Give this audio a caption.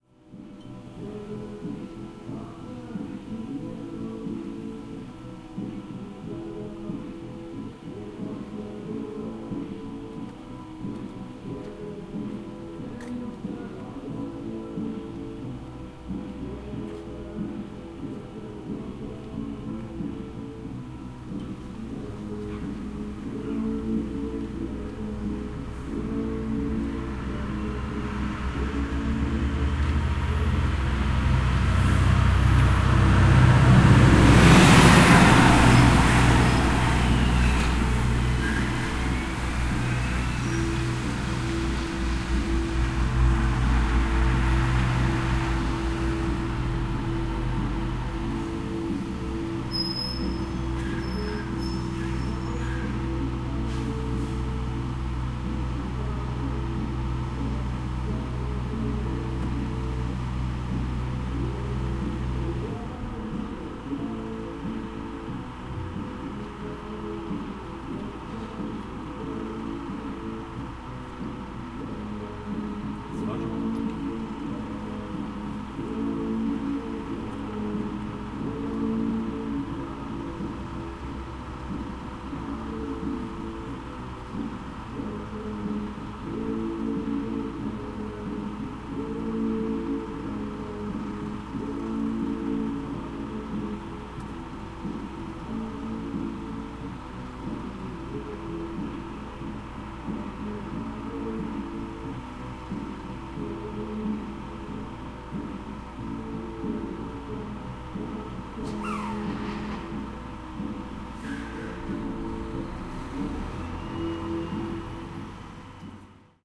110806-pause in oure ambience5
06.08.2011: seventh day of ethnographic research about truck drivers culture. second day of three-day pause. Oure in Denmark, fruit-processing plant. ambience: music listening to the radio (from the truck parking next to ours truck) , passing by truck bringing in blackcurrant.